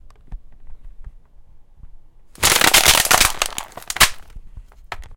ns plasticbreak
Crushing a plastic bakery container
container, crush, plastic